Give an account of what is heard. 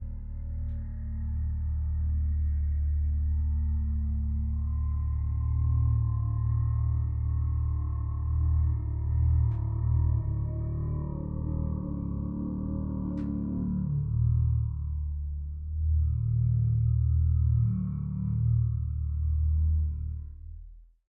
Processing of a vocalization that originally resembled a didgeridoo.